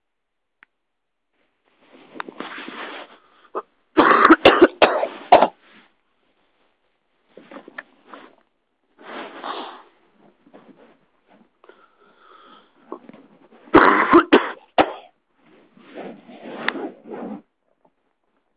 coughing in bed (early morning January 16th of 2009)
coughing, cough, night